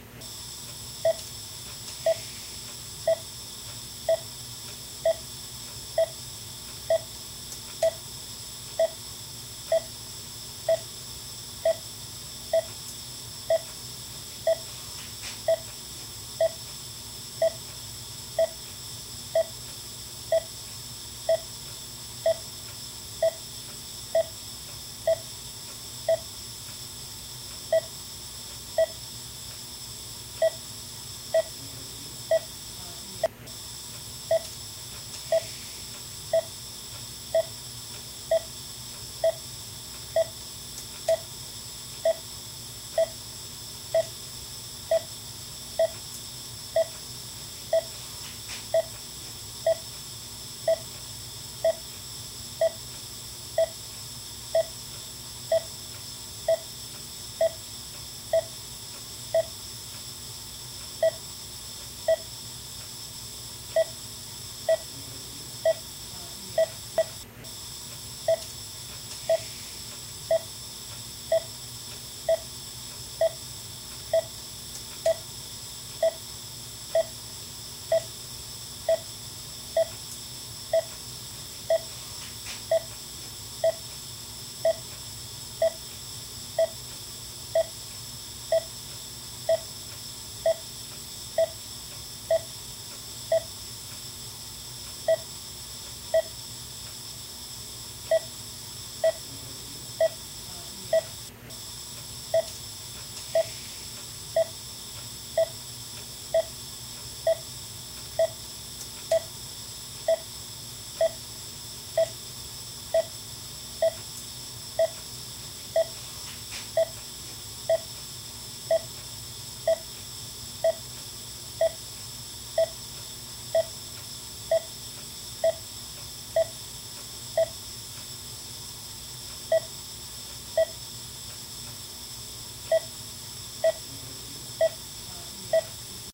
Pulse Monitor with Oxygen noise
hopsital, pulse, oxygen